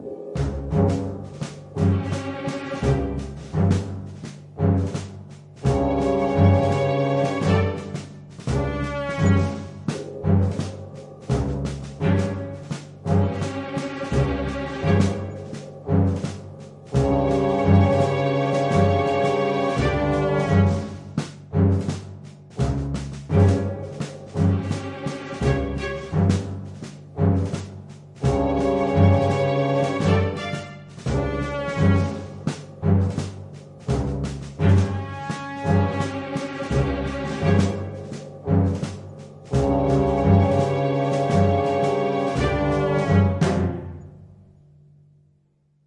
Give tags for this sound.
military orchestral music waiting battle battlefield uncomfortable army classical tremolo trombone march snare strings glissando orchestra tension

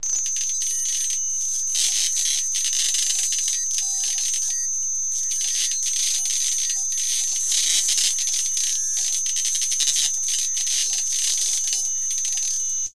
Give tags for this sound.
glitch processed sci-fi

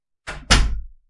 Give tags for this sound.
apartment close door flat house open shut swing

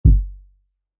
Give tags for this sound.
II HIP-HOP HOUSE BOSS SERIES MXR YAMAHA KICK TECHNO PRO PSR-215 MORLEY MD-2 EQ-10

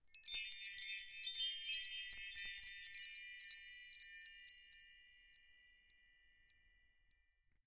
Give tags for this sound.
percussion random Wind-chimes

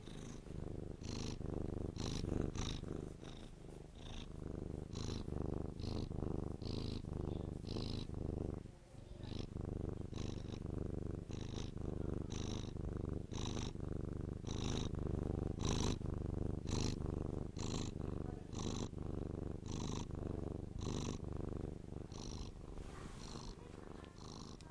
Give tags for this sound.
animals cat

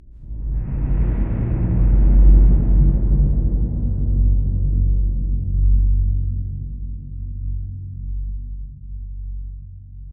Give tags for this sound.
ambient construction expand grow large

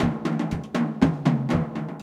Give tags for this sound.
drum loop

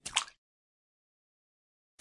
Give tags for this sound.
wave Wet Game crash aquatic blop Running Dripping Sea Run Lake Movie pouring marine aqua Splash bloop Water Drip River pour Slap

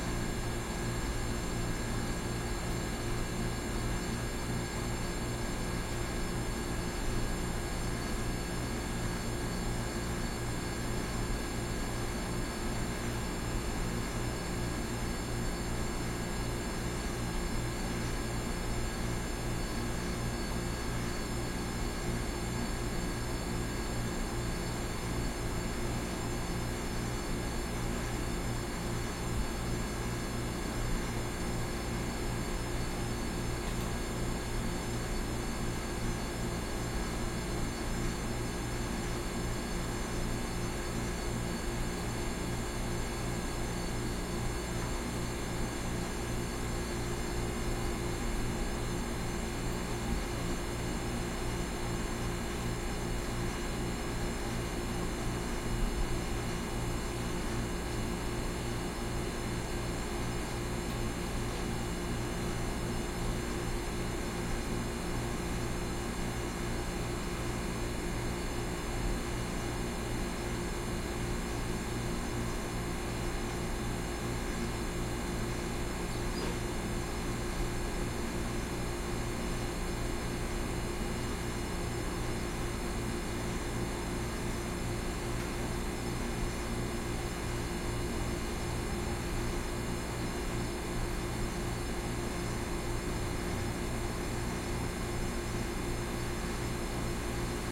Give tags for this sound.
apartment fridge kitchen room tone

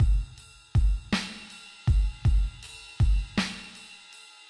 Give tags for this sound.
beat drum-loop Triphop loop drums Trip-hop

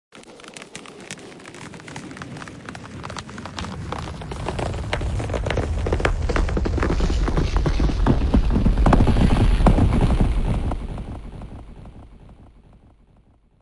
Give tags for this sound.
abstract part slowing down sound-design particle moving

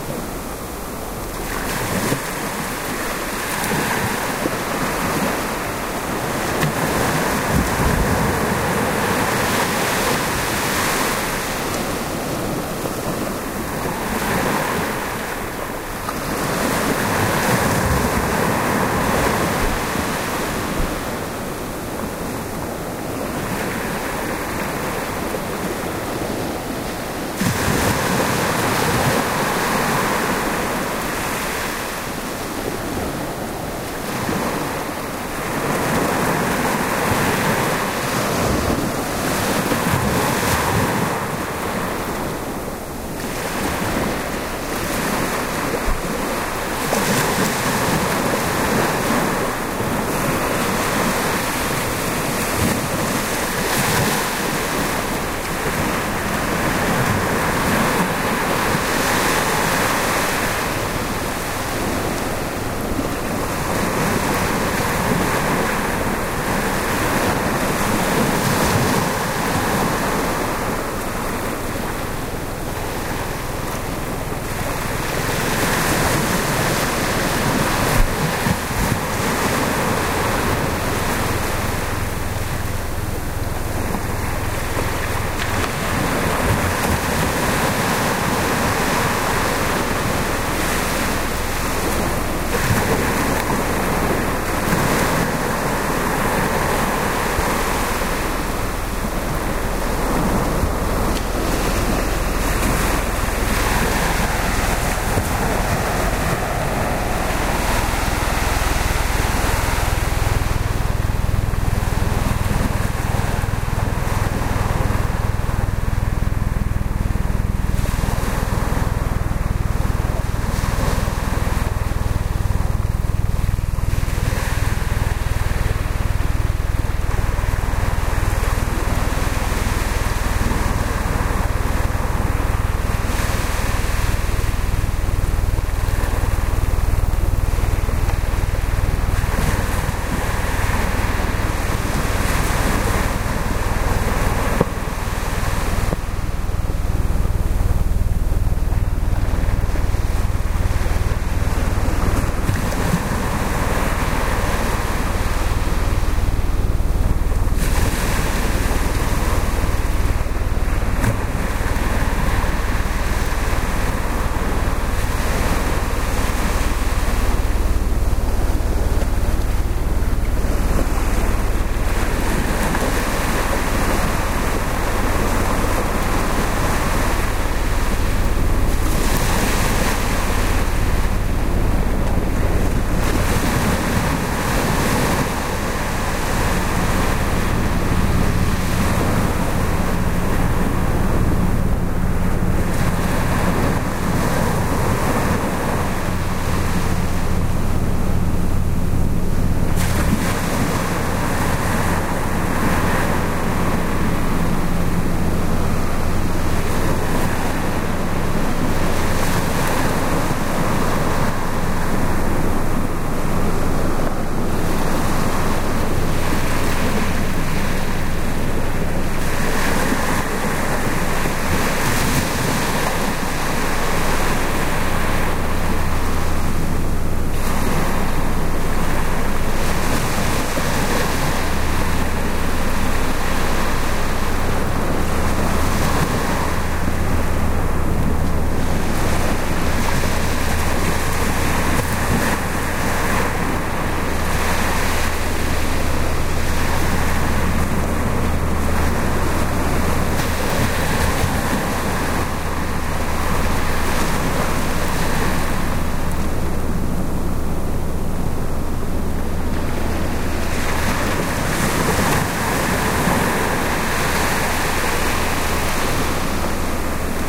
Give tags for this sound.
Denmark field-recording northsea ocean Skallingen waves

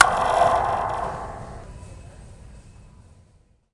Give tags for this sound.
response ir free convolution reverb spring impulse vintage

